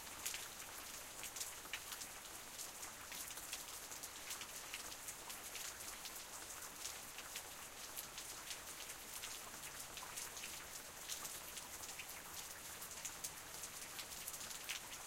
outside-rain-medium1
A medium rain as heard from outside on my front porch.